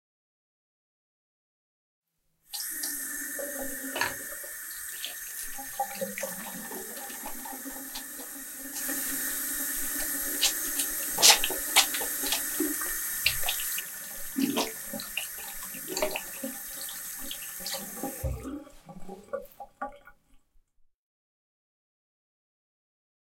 5. Washing hands
A woman washes her hands with soap